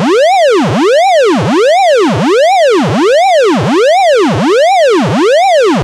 Siren effect made with PC Speaker beeps. (From 99 sq.hz to 800sq.hz (10 step) and vice versa.